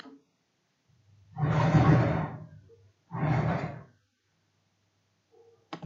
heavy scrape
I recorded someone pushing a couch into place and it came out quite well so I decided to upload it. This could be a useful sound effect in animations and/or movies in which you do not have the original sound.
brush, brushing, grind, grinding, heavy, pull, pulling, push, pushing, scrape, scraped, scraping